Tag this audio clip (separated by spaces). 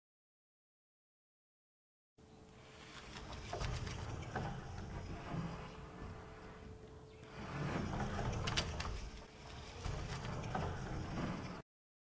sliding-door OWI film mat